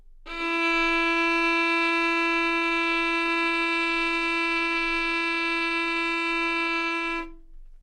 Part of the Good-sounds dataset of monophonic instrumental sounds.
instrument::violin
note::E
octave::4
midi note::52
good-sounds-id::1452
dynamic_level::mf